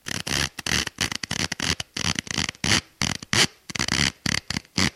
Creepy Shoe Sound